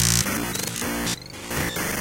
Bend a drumsample of mine!
This is one of my glitch sounds! please tell me what you'll use it for :D
databending, system